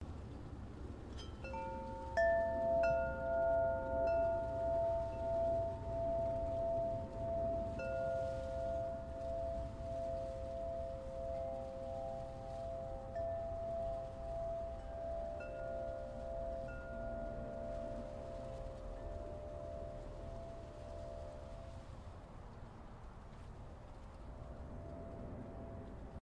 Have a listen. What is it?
Chimes recorded in a garden though somewhat near a highway.